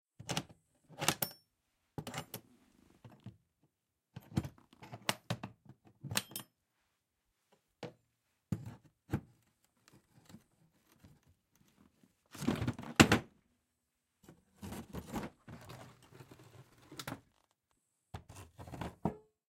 Recoreded with Zoom H6 XY Mic. Edited in Pro Tools.
Opening a toolbox with a crowbar.